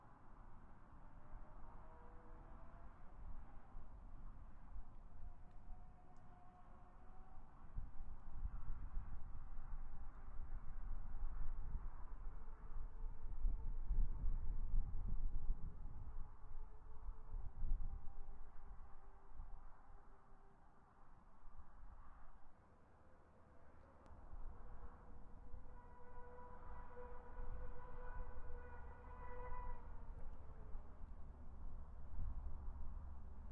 090226 00 highway motorcycle circuits
racing motorcycle on circuits near highway
circuits, motorcycle, highway